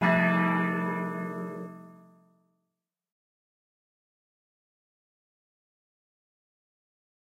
Church Bell 8
A big spooky Tubular bell hit.
I'd love to see it!